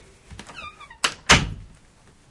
door close 2
creak, door